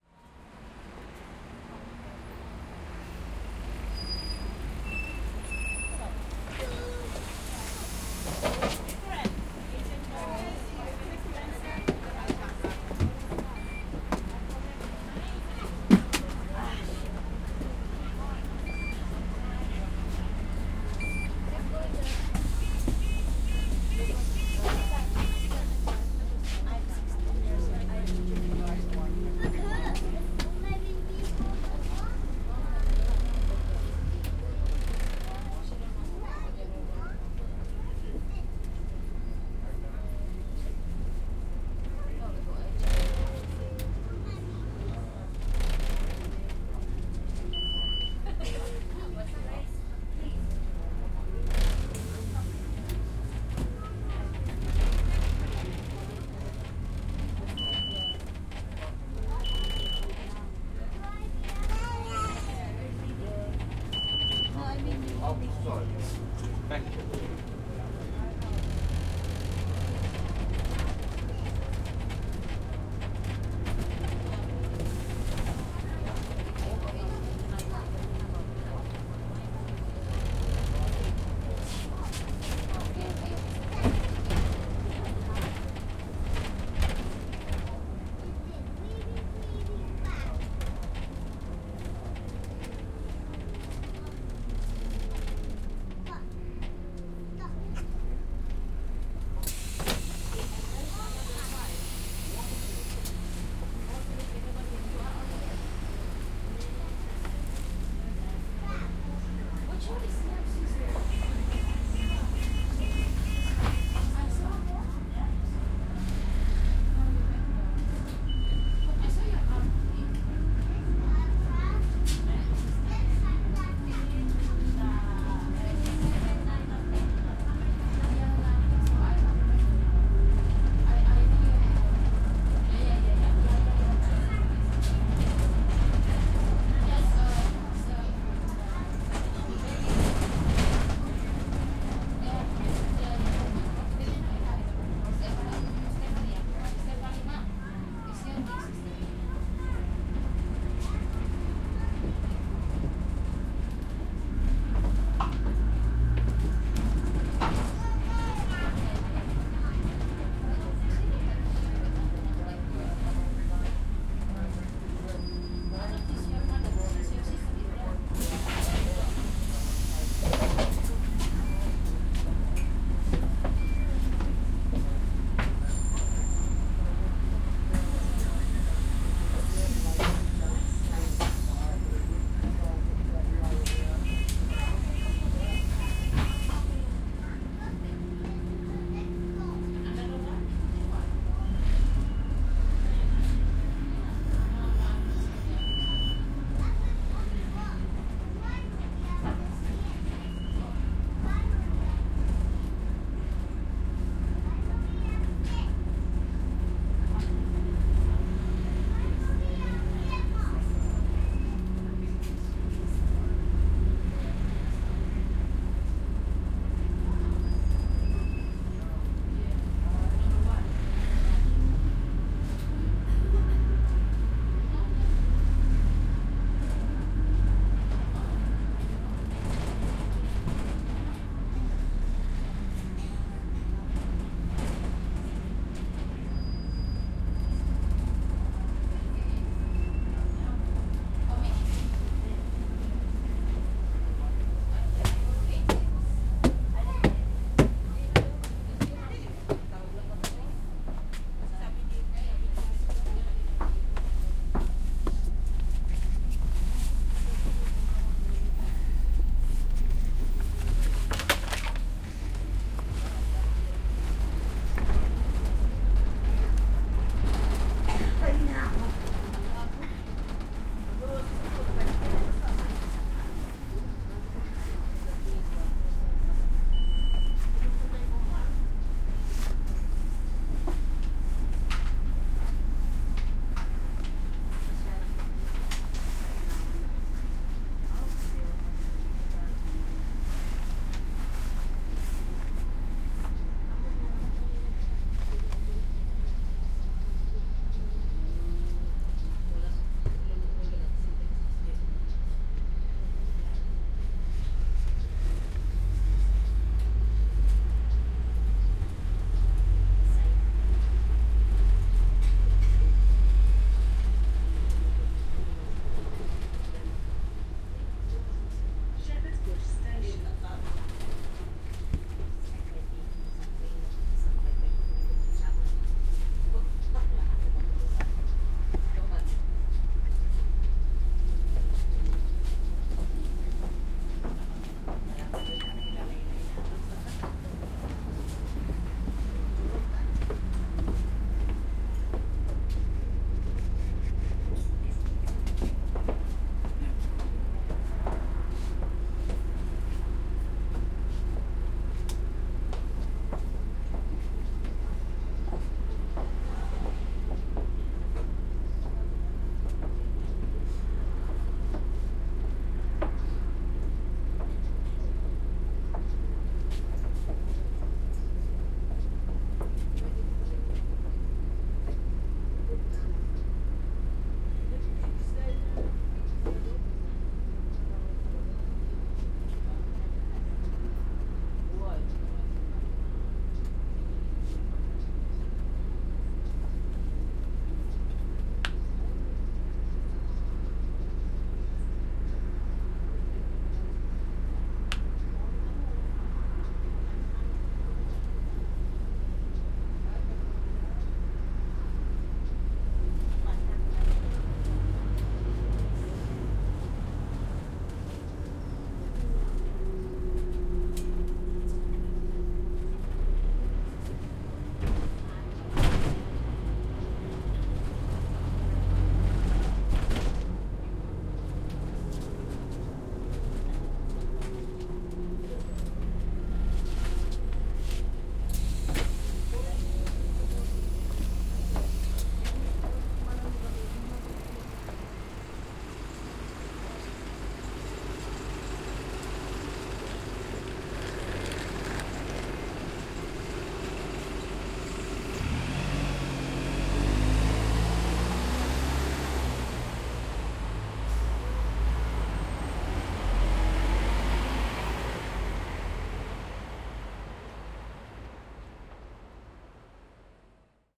A London Double-decker arriving to a bus stop, stepping on board, validating Oyster card. Lower deck - bus driving between stops, doors opening/closing etc, medium crowd chatter. Going to higher deck, driving a few stops - very light chatter in the background. Eventually getting off bus.